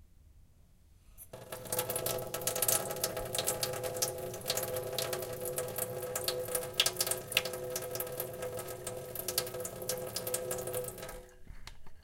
Water Faucet running in Metal Sink
Sound of water running in a metal kitchen sink.
flowing, kitchen, sink, metal, water, running